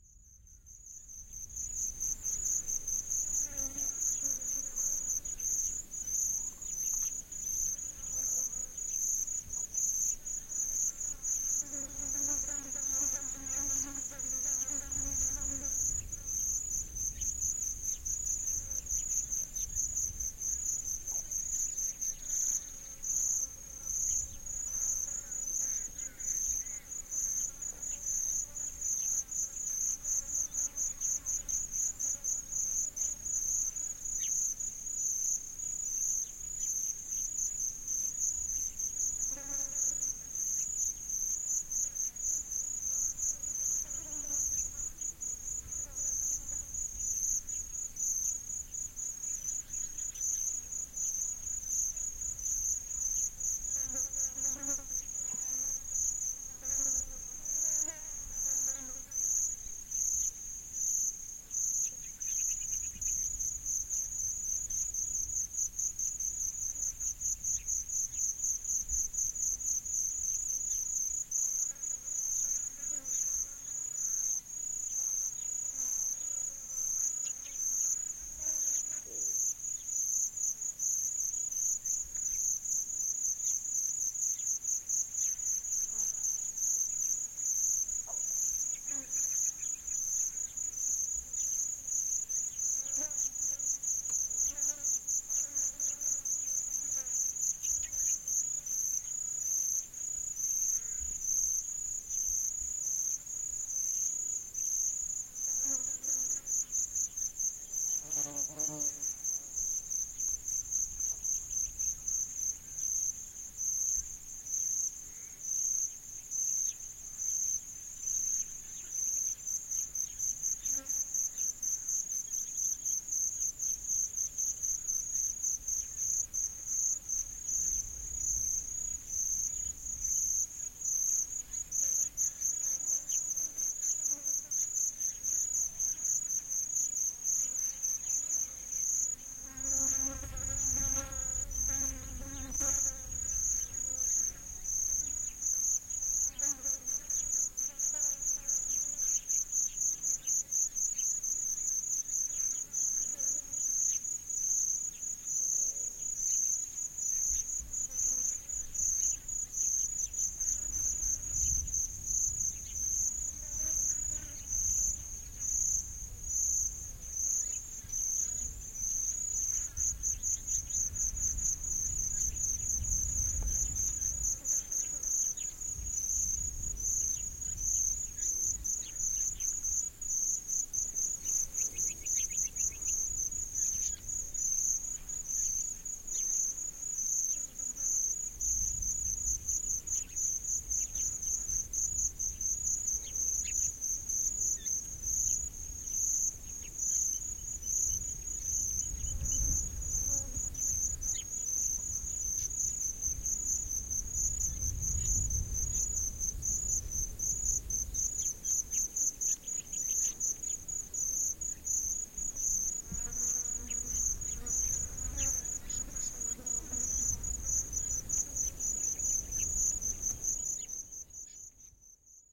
Kamira Atmos
Kamira is a special place to Warlpiri people. This is a recording made one afternoon.
An MS stereo recording done with a sennheiser MKH416 paired with a MKH 30 into a Zoom H4n
nature, birds, soundscape, ambient, ambience, australian, Australia, australian-outback, ambiance, atmos, bird, atmosphere, field-recording, insects